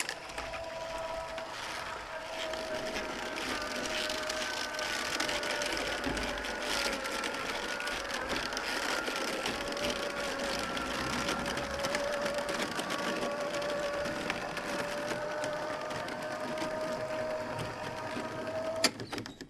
An old electric roller shutter opening. This is a small PVC shutter that is installed at a French window door at my house. The shutter has about 2.1m high by 1.2m wide (the size of the window). Notice that the motor is really making an effort to pull the shutter.
electric, open, window, roller, shutter, old